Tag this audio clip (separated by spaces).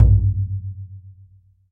drum raw drum-sample drumhit sample simple perc percussion hit deep world low oneshot frame-drum recording